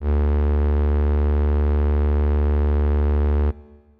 FM Strings D2
An analog-esque strings ensemble sound. This is the note D of octave 2. (Created with AudioSauna, as always.)
pad, strings, synth